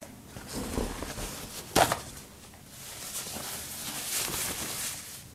A sound effect of toilet paper